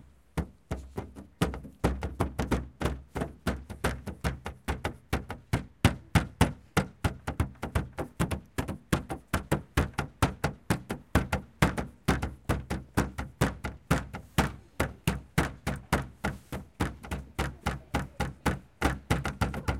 Sonicsnaps-OM-FR-poubelle
Playing the dustbins...
sonic, TCR, snaps, field-recording, Paris